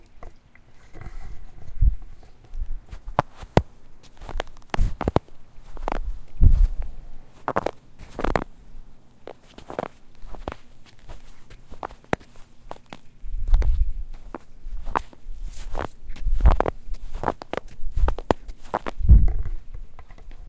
The classic sound of snow crunching under your feet
walking-snow, snow, crunch